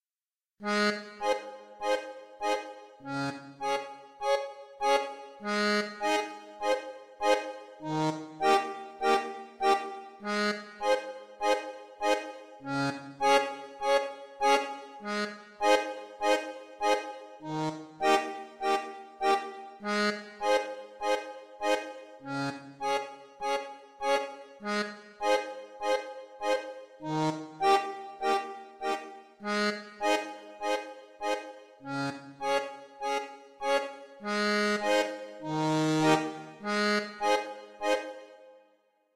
Thirty seconds of upbeat happy pirate accordion music, good for the background in a seafood restaurant or marina-side pub where all the salty dogs go for a beer after a long day of riding the high seas.